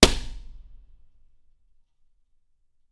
Windows being broken with various objects. Also includes scratching.
breaking-glass, break, window, indoor